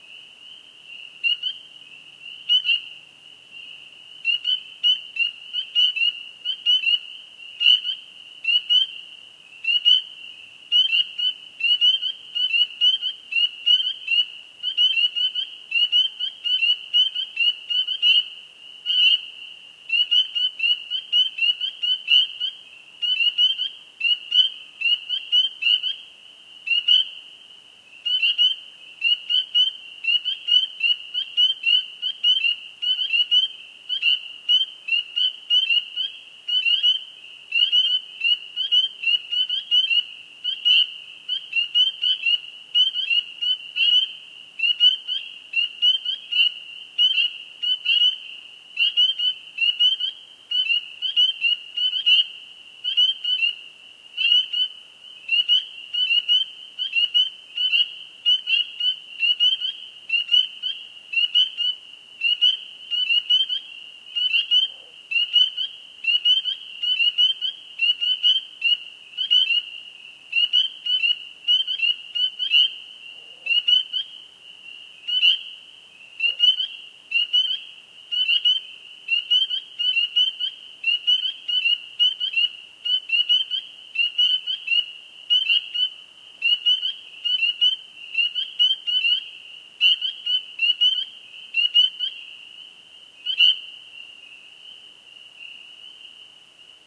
Spring peepers, medium close perspective, good stereo effect. A distant fog horn is barely audible. Recorded in northern Michigan, U.S.
field-recording, frogs, nature, spring-peepers